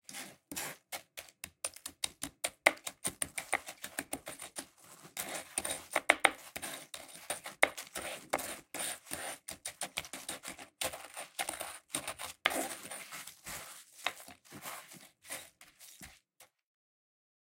Cracker Foley 4 Far
Graham cracker foley recorded with a pair of mics in XY stereo arrangement (close), and small diaphragm condenser mic (far) running parallel. Processed in REAPER with ambient noise reduction, compression, and EQ. Each file mixed according to the title ("far" or "close" dominant).
dusting, design, foley, pop, steps, sound-design, food, dry-bread, sound, sounddesign, sfx, crumbling, gingerbread, cookie, crackers, step, foods, cookies, effects, footstep, crumbles, dust, crumble, graham, gamesound, cracker